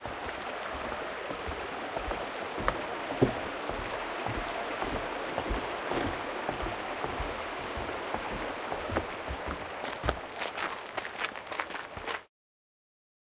001 - Footsteps Over Wooden Bridge
Bridge, Foley, Walking, Field-Recording, Footsteps, Wooden